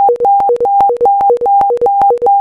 Sinusoidal sound (800 Hz) with melt closure / opens, decreasing speed of 40%, repeted 6 times, and tremolo.